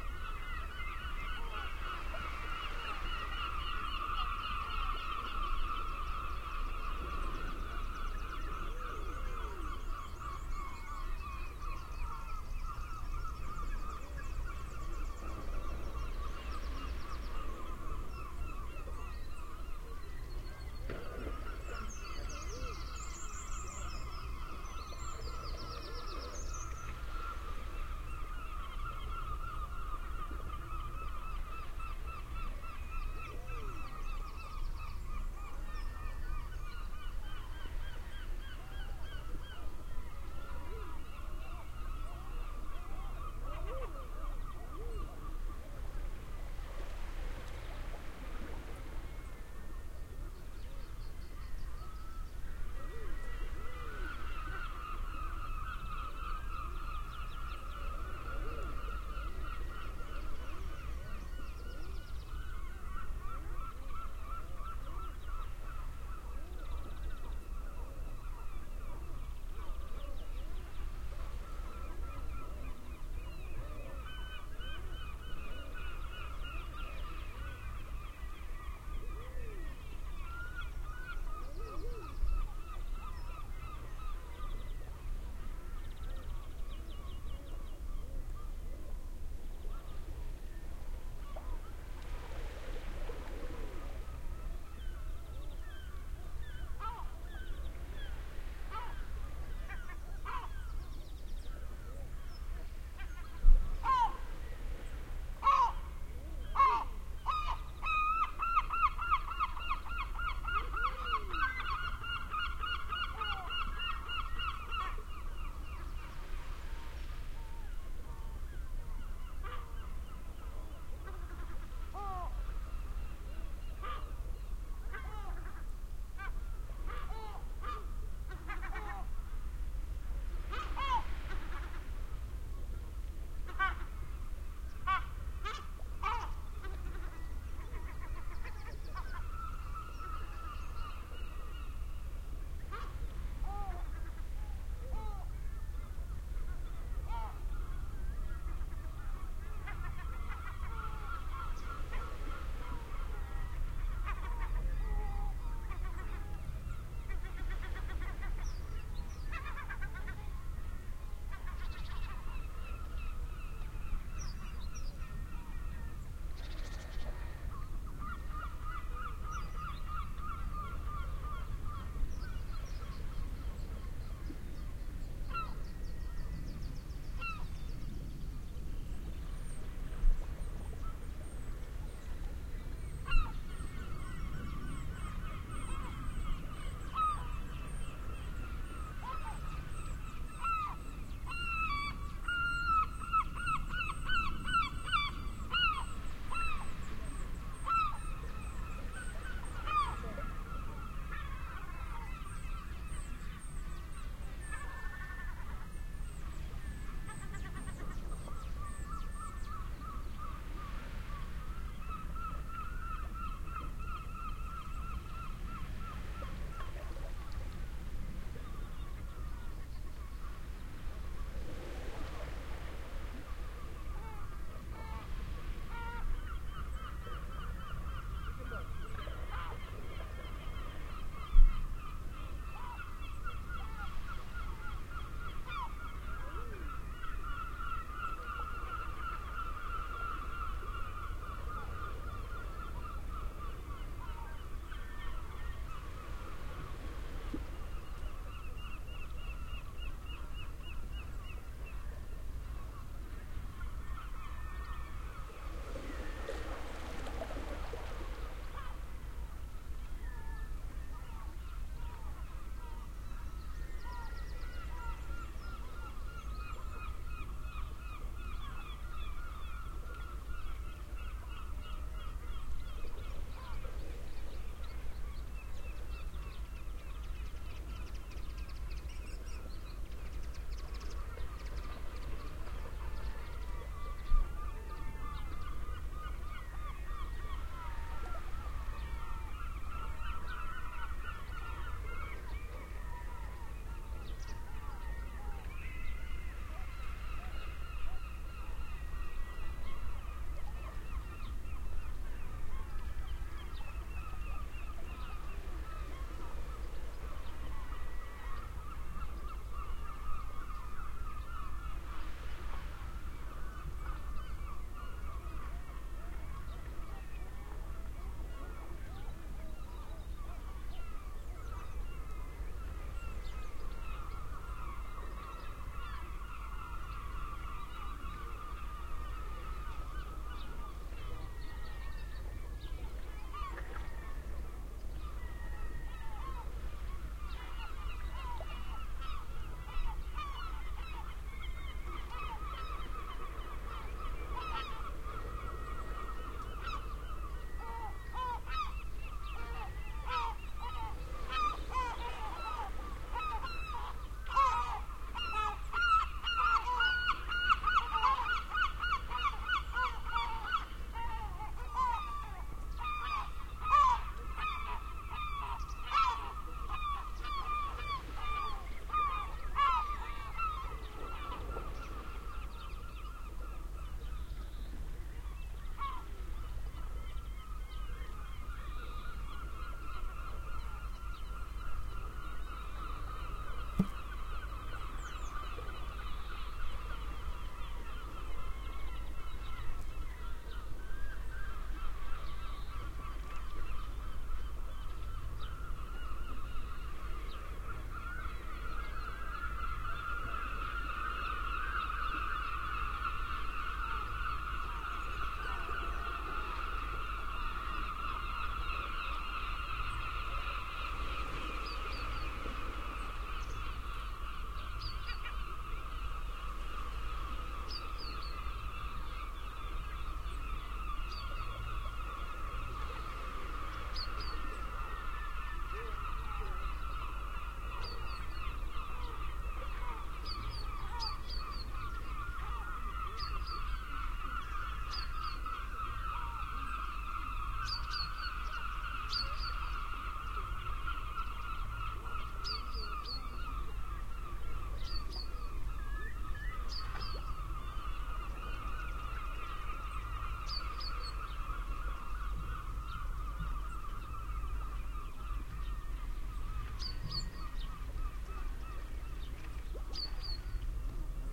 The recording was done on the shingle beach of the brilliant Catterline Harbour, Aberdeenshire. As it was springtime the cliffs were busy with nesting seagulls.Shure WL183 microphones, a FEL preamp and a R-09HR recorder.What sounds like talk were those birds.